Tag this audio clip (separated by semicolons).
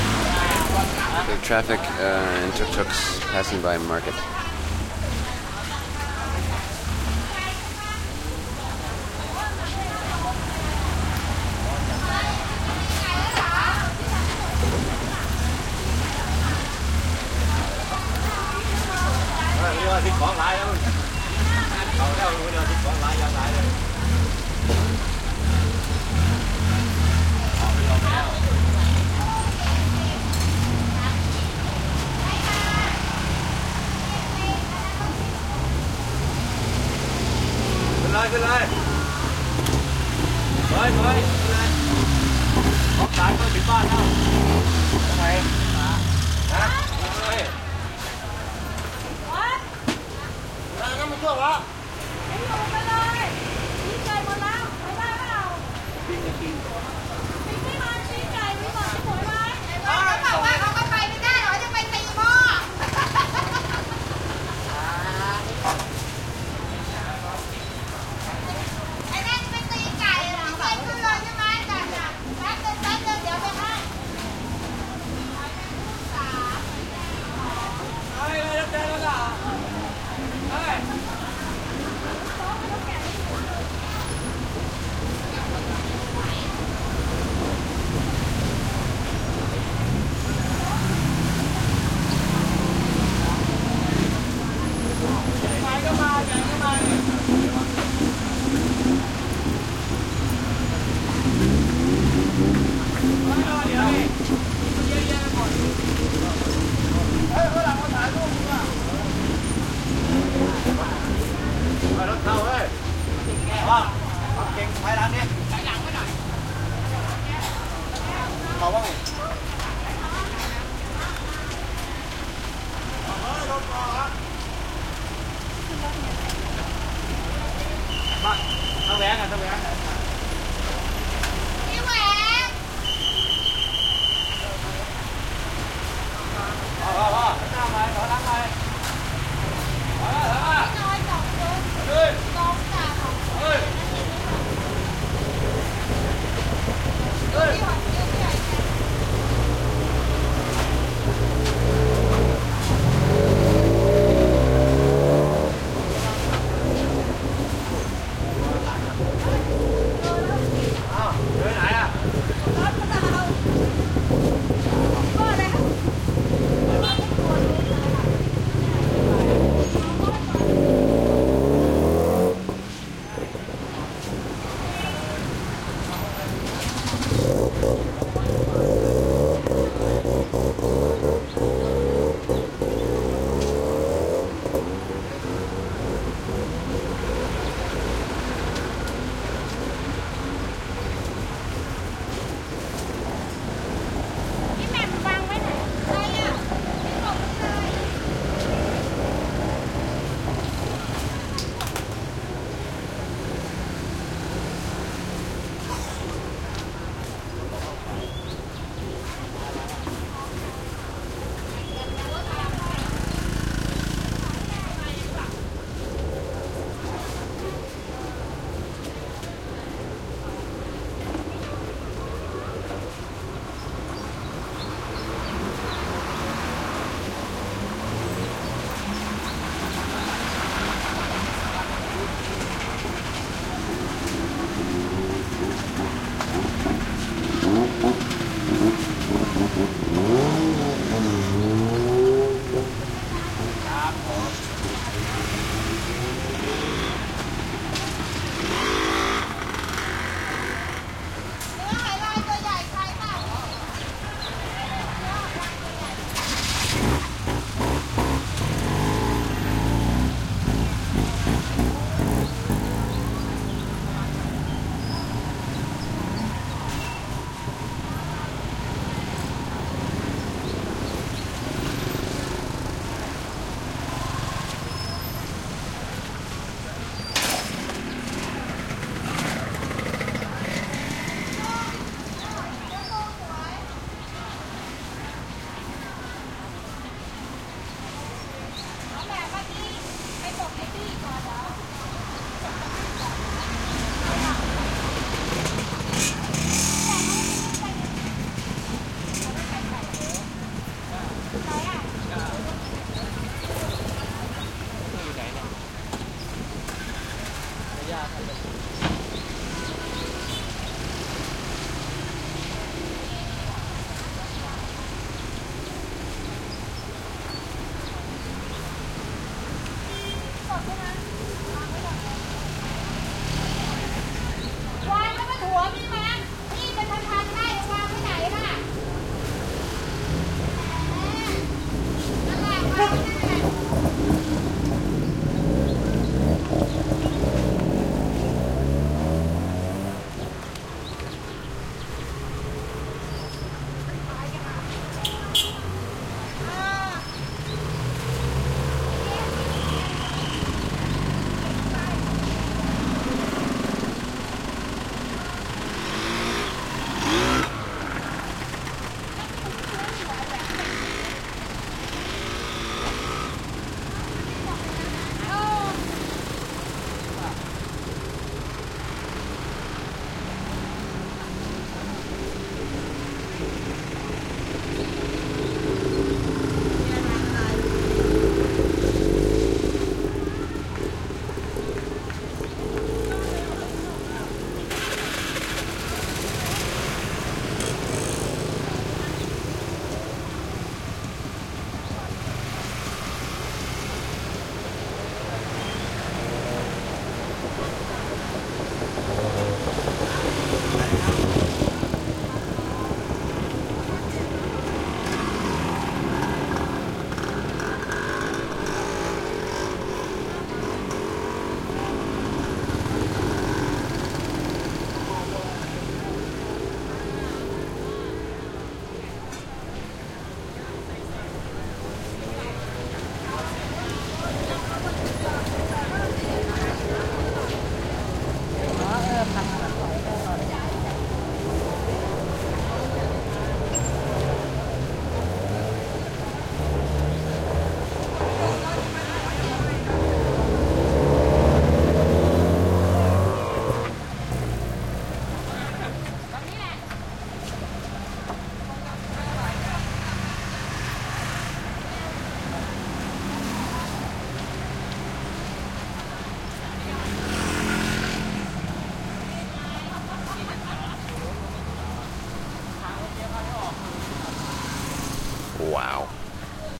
activity; Bangkok; busy; field-recording; market; motorcycles; Thailand